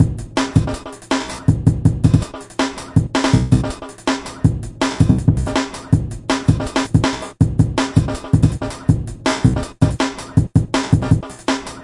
remix vexst 44556
remix
soundforge 7 :sampling cut looping / vst slicex combination slices
beat, beats, break, breakbeat, breakbeats, breaks, drum, drum-loop, drumloop, drumloops, drums, jungle, loop, loops, remix, sampling